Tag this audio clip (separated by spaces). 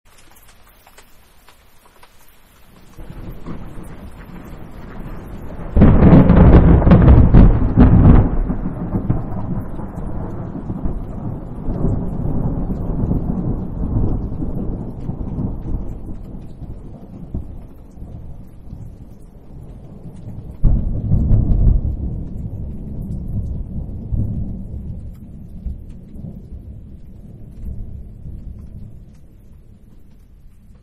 BLAST-of-thunder distant-booms distant-rolling-thunder